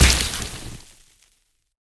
Squish impact
Some fruit being used to layer a squish sound